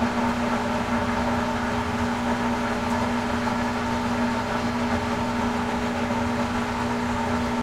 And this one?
washing machine wash2 cycle
During the wash cycle.
cycle, industrial, machine, wash, washing, water